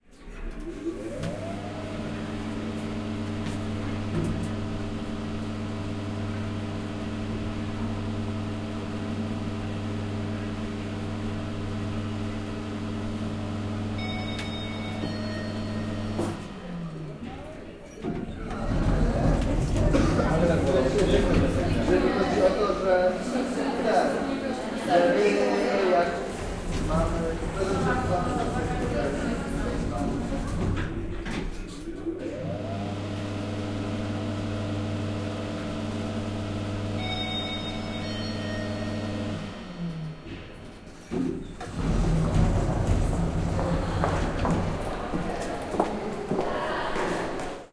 historicum elevator 031111
03.11.11: about 15.00. the lift in Collegium Historicum (Adam Mickiewicz University) on Sw. Marcin street.